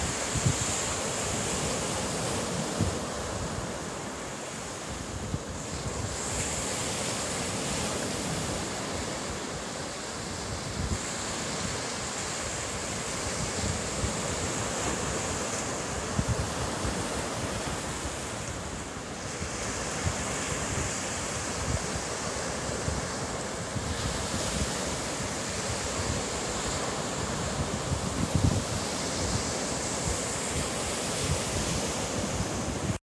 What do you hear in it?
Waves-Medium-Germany-Northsee-Langeoog
Langeoog Germany small/medium waves
atmophere, field, recording